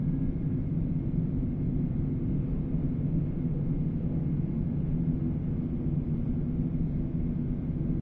Passage Way Ambience (Can Be Looped)
Passage Way ambience that can be looped.
able, ambience, ambient, anxious, atmos, atmosphere, background, background-sound, bogey, creepy, drone, Gothic, haunted, hd, loop, noise, Passage, phantom, scary, sinister, sound, soundscape, spooky, suspense, terrifying, terror, thrill, Way